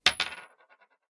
coin or money spinning on a wooden or plastic table